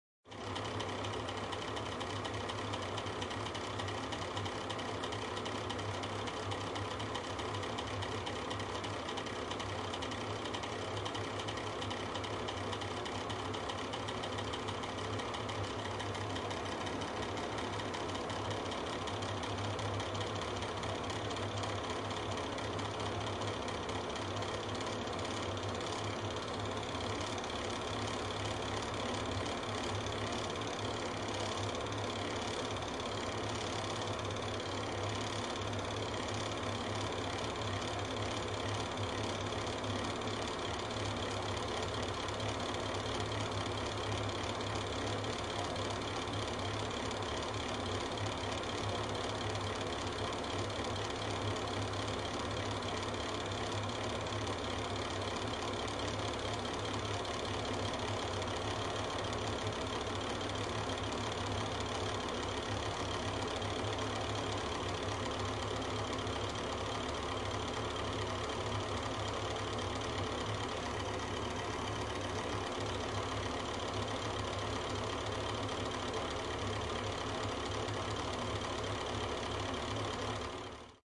Room Tone - bathroom with vent fan on (close to fan)
Room Tone - bathroom with vent fan on (recorded semi-close to fan)
bathroom, bathroom-fan, room-tone, fan